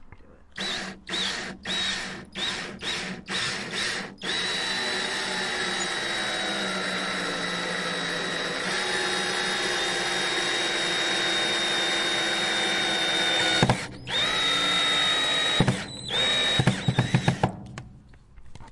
drilling a screw into wood
Using a drill to put a screw into wood with screw resisting at the end.
wood, electric, workshop, drill, drilling, screw, tools, screwing